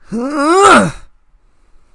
Human groan female 02
sound of a woman groaning
woman, female, groan